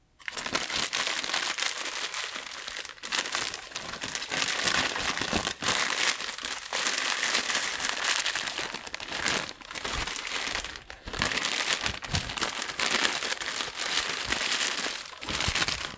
plastic bag / amassando sacola plastica
wrapping, cellophane, crackle, wrap, plastic, bag